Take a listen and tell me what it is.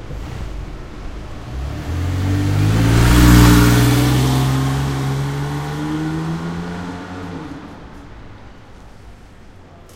Porsche 911 in the street
911, field-recording, porsche